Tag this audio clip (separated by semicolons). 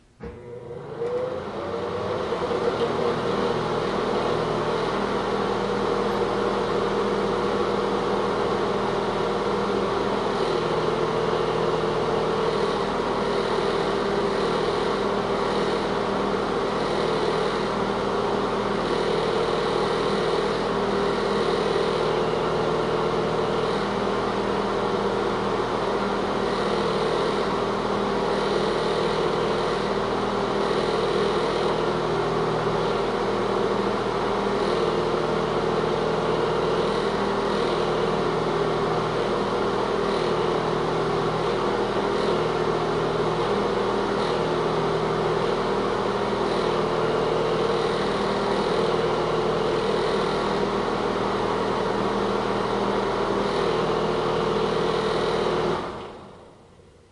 Bathroom; household; Mechanical; Fan